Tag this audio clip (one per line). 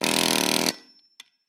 blacksmith
motor
tools
metalwork
work
labor
1beat
metal-on-metal
blunt
deprag
hammer
one-shot
pneumatic-tools
crafts
pneumatic
forging
80bpm
air-pressure
impact